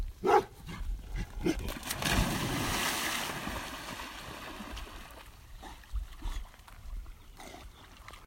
Dog bark and splash
A dog barks then lands in the water with a splash